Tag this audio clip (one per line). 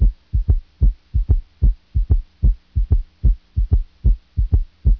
anatomy
cardiac
pulmonary